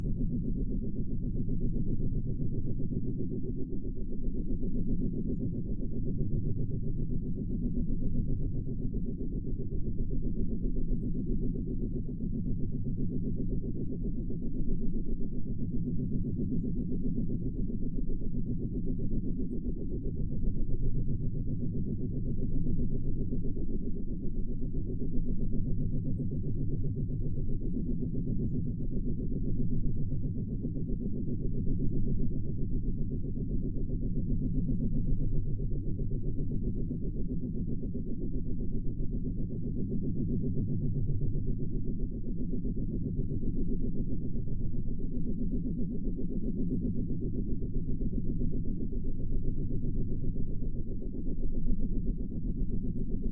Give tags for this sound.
Rhythm; Helicopter; Fan

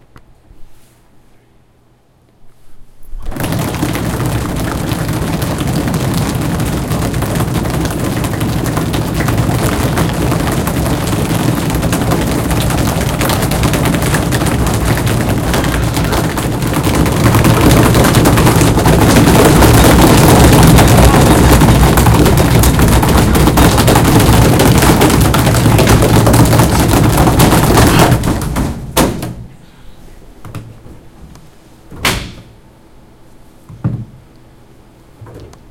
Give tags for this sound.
downpour; heavy; pounding; heavy-rain; waterfall; rain; horses

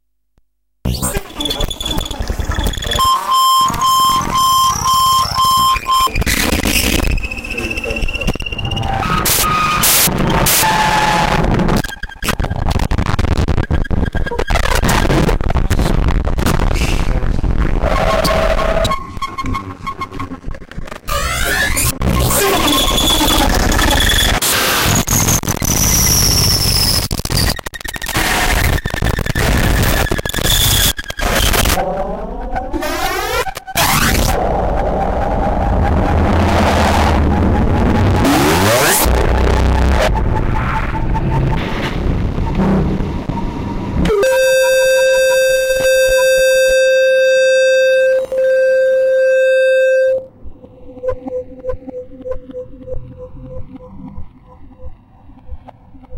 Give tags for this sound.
glitch; filtered; industrial; noisy; electronic

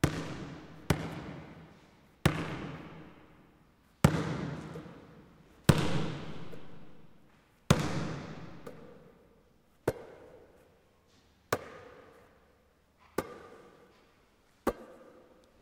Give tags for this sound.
ball; basket; hall

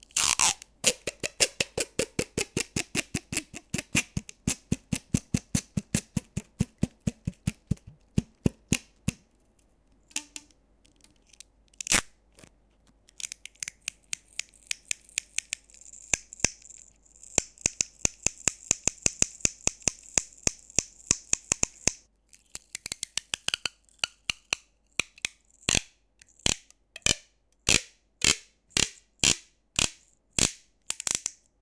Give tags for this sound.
field-recording; tick; click; tack; tape; glue; duct-tape

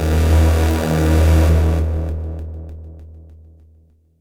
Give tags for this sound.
sack; hackey

